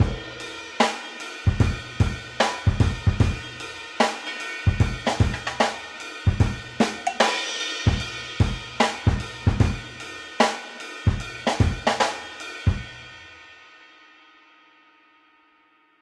Jazzy Beat 75bpm
A Jazzy Drum loop made with XLN Addictive Drums in FL Studio 10. 75bpm.